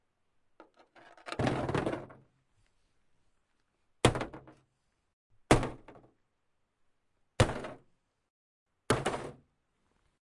opal creek ice 2
I threw some rocks on some hollow ice.
break, cold, crack, foley, ice, nature, outside, snap